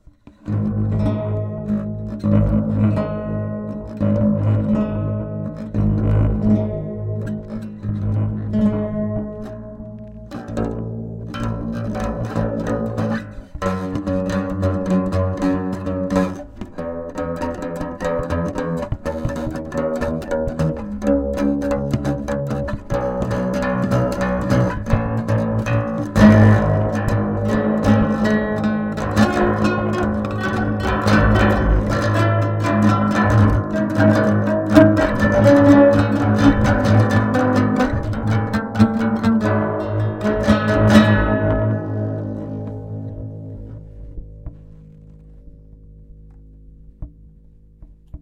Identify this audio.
I have always admired gutarists like Segovia, Eric Clapton, Mike Oldsfield, Woodie Guthrie, BB King to mention some. Myself I play nothing, didn't have the luck to grow up in a musician family. So I have whole mylife seen myself an idiot who can never learn an instrument. Had a visit recently, a friend of my daughter. He found an old, stringless guitar among a lot of stored stuff in our cellar. He had just bought a set of strings for his guitar, but he mounted them on our guitar. He was playing for an hour and I said how I envy him. Why, he answered, here, sit down and play. I put THe instrument aside and told him I was too stupid. Well, maybe, he replied, but most of us guitarists are idiots. To my surprise I found the strings, but had certain problem to press hard enough to get a clear tone. I tried for a couple of hours and recorded the fumbling and rattling. Next day I tried again and recoded and I spent an hour for five days. You can follow file novasound330a to 330e.
Andre, guitar, Guthrie, learning, newbe, Segovia, Woody